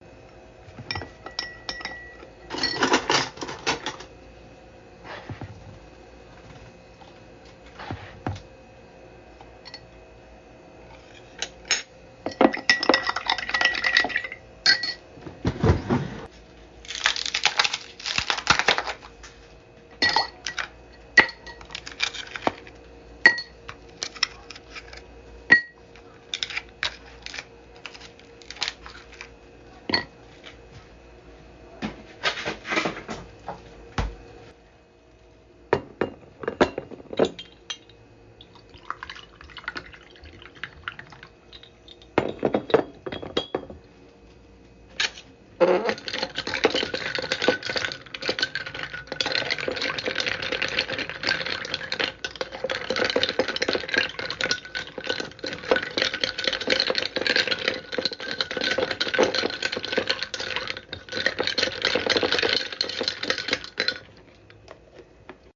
Make Iced Tea
instant tea. happy indulgence